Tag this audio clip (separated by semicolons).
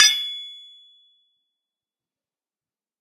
1bar; 80bpm; anvil; blacksmith; clashing; crafts; forging; impact; iron; labor; lokomo; metallic; metal-on-metal; metalwork; smithy; steel; tools; work